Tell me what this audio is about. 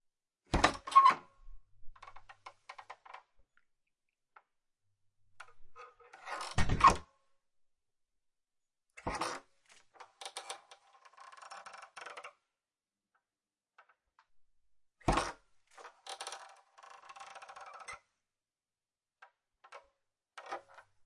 Creaky wooden door handle (open & close)
Various takes on opening and closing a creaky door handle on a wooden door
door, wooden-door, door-handle, open, stereo, creaky, household, close, spooky, home, handle